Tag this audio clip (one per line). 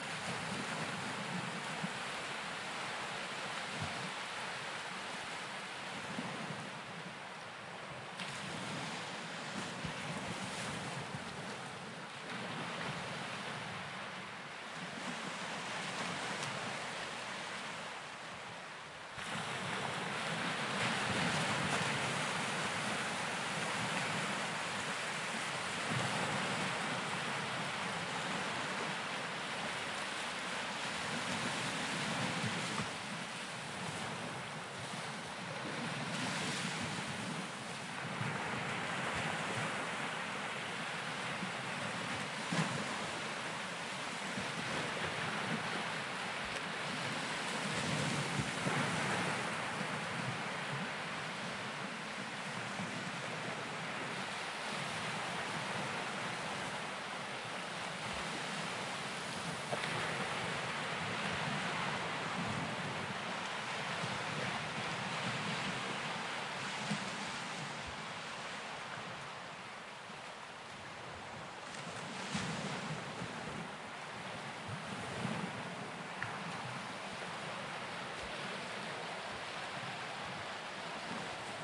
beach
waves
sea
ocean
light